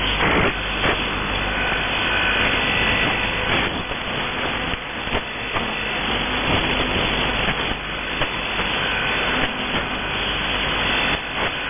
Just some static and shortwave tunning noise.
Recorded from the Twente University online radio receiver.
Twente-University, radio, static, short-wave, shortwave, noise